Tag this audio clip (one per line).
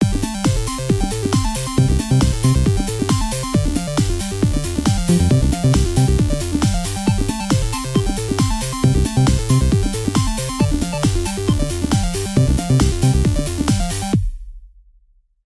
music
electro
loop
fun
synth
funny
electronic